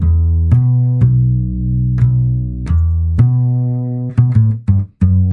Jazz Bass B 3
jazz, music, jazzy
jazz, music, jazzy